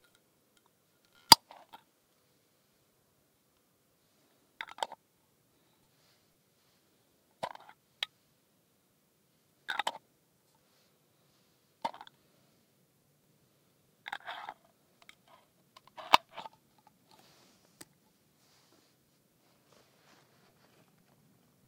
A jar of pickled onions being opened and closed. Recorded in the Derbyshire countryside an hours walk out of Derby city center.